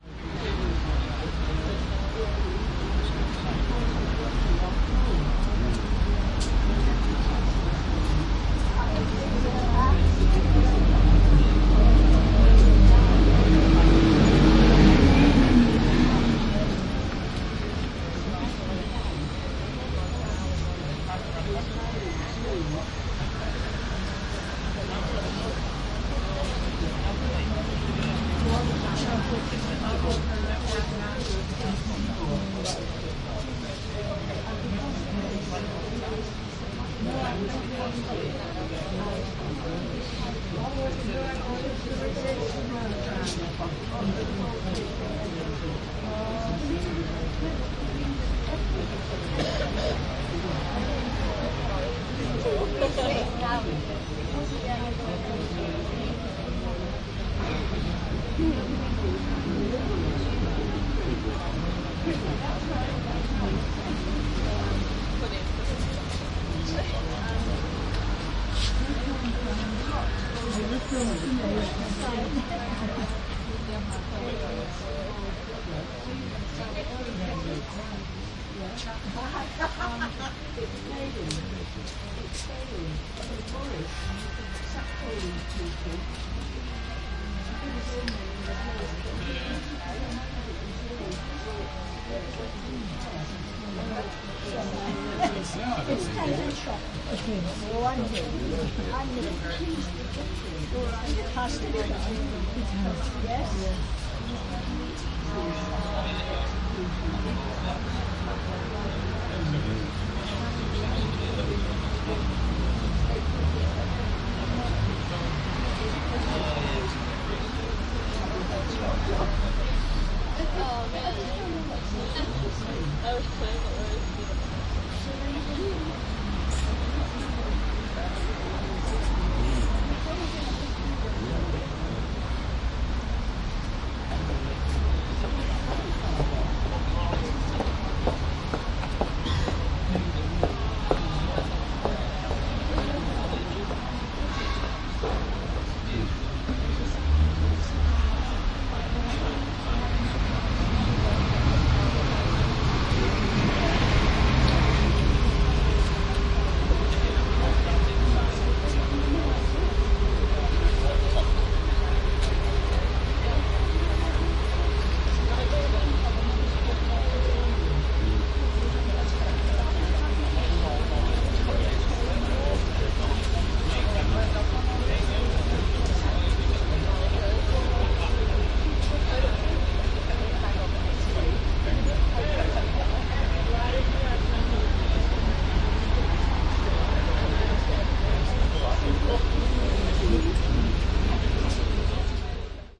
York traffic and voices
Traffic and voices binaurally recorded in York, UK.
traffic, voices, EM172